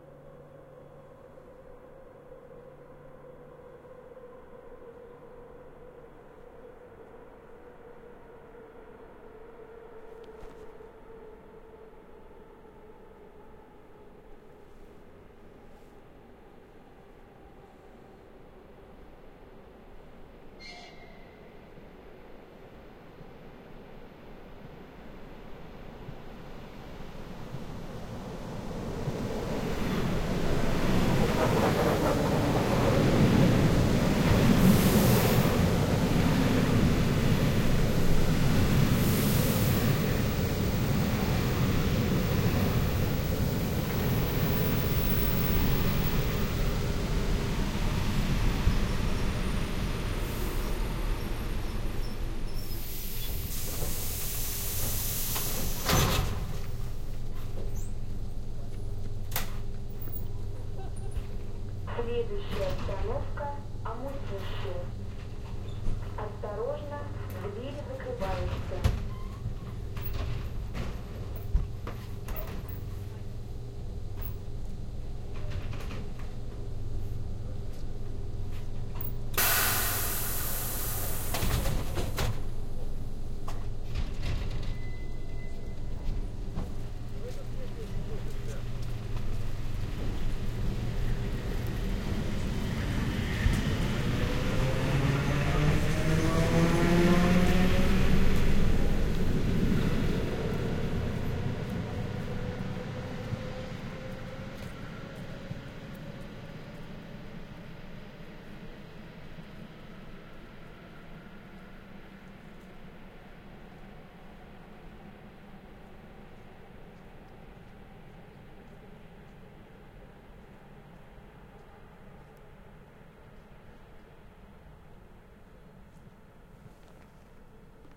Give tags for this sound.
train; Russia; departure; platform; Russian; passengers; railway; suburban-train; arrival; railroad; trains; station

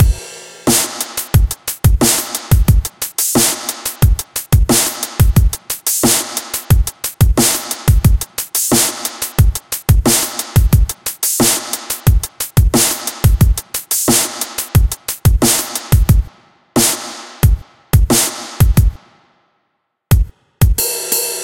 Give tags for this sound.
179BPM; Bass; Beat; DnB; Dream; Drum; DrumAndBass; DrumNBass; Drums; dvizion; Fast; Heavy; Lead; Loop; Melodic; Pad; Rythem; Synth; Vocal; Vocals

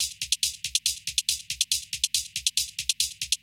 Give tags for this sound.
beat; dance

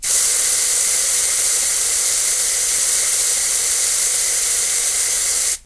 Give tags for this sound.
pressure; deodorant; air; spraying; spray; burst

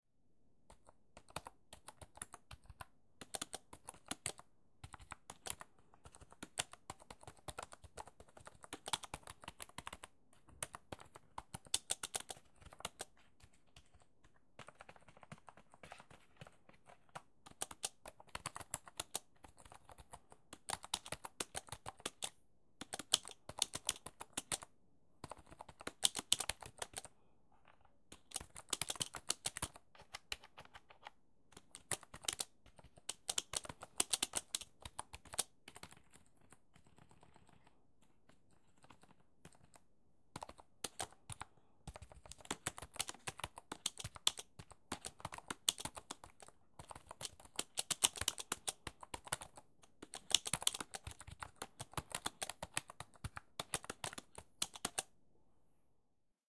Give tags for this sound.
close; computer; quick; stereo; typing

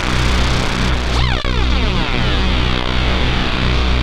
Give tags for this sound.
circuit; hardware; noise; synth

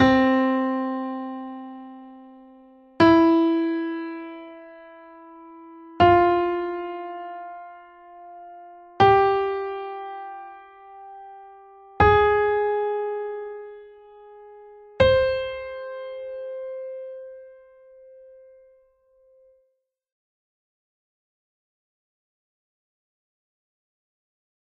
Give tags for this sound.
symmetry; third; pentacle; aural

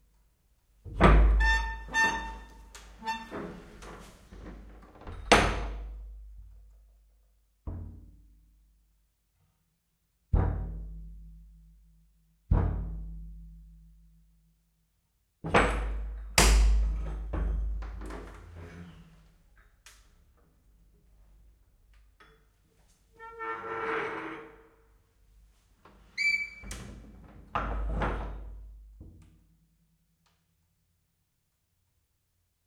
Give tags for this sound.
Door; Shelter; Handle; RSM191; Neumman; Bomb; Metal; Lock

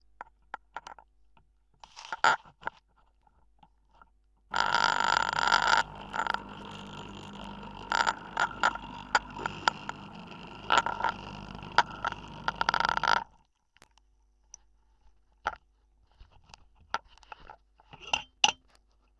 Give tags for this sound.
coffee-machine; contact-mic; expresso-cup; homemade; noise; piezo; rattle; vibration

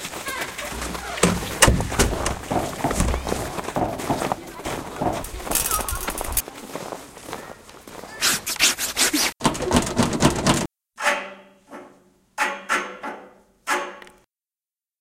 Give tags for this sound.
Essen; Germany; January2013; SonicPostcards

